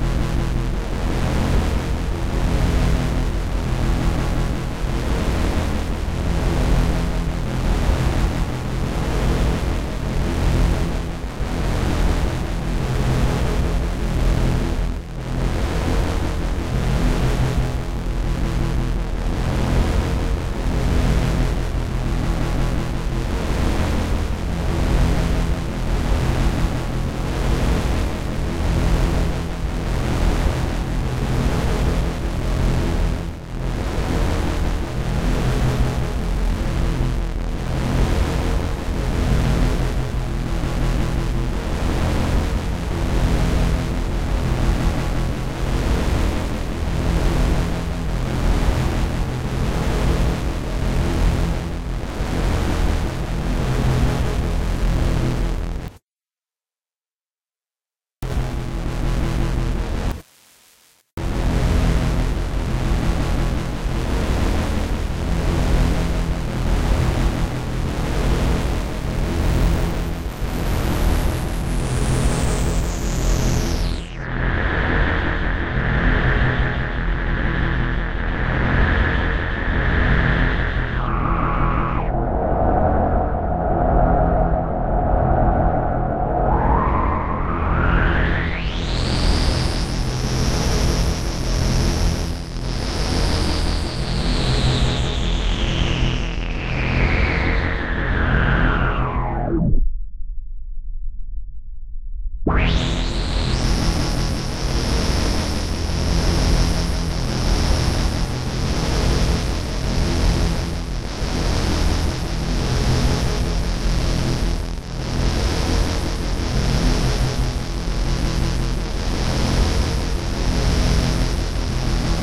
Recording of a bass drone, processed with Audiobulb AMBIENT software